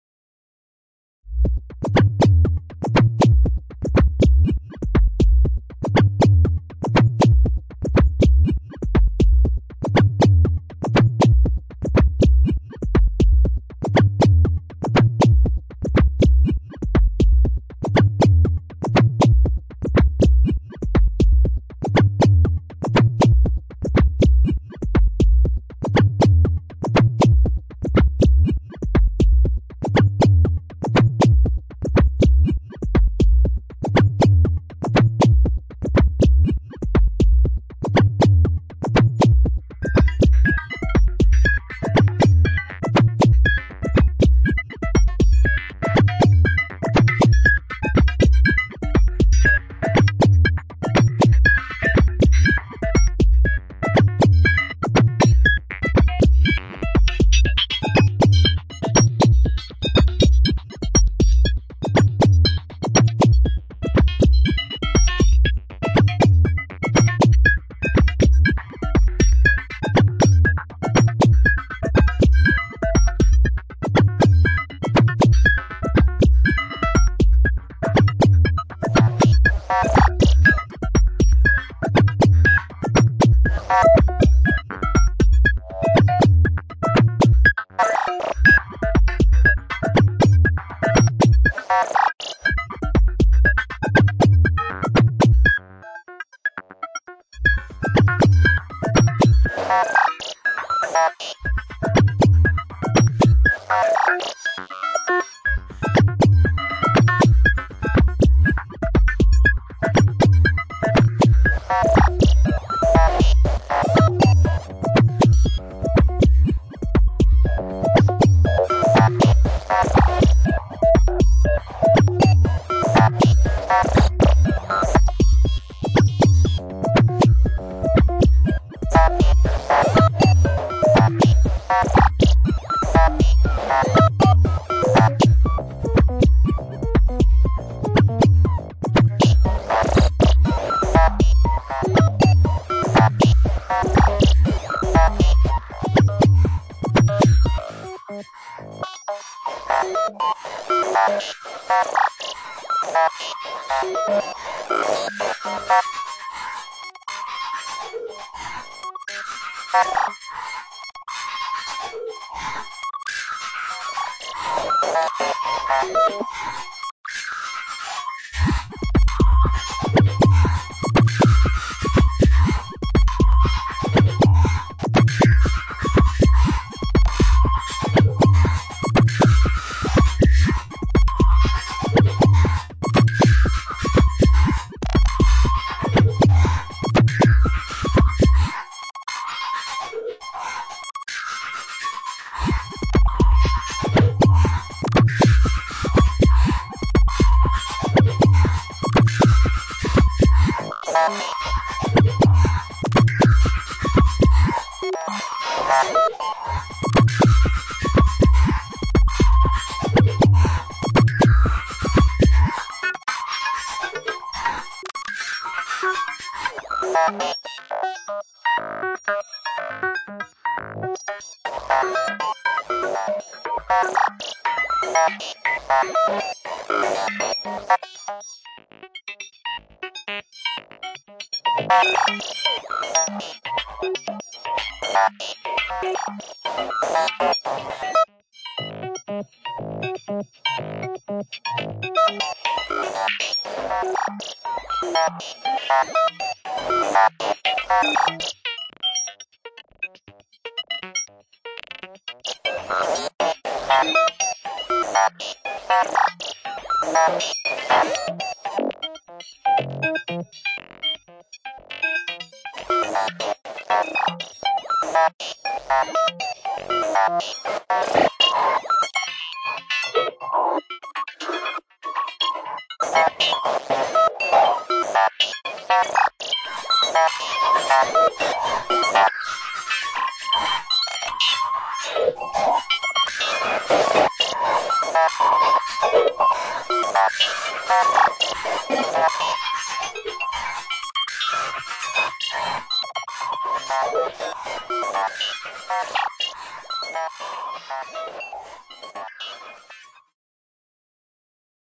VCV Rack patch